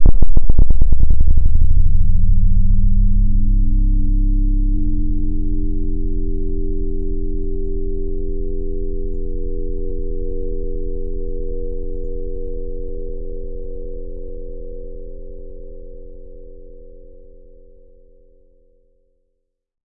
PS003LC 018
This sound belongs to an original soundpack containing 29 samples created through the idea of imagining hidden realms of existence and reality using synthesizers and effects.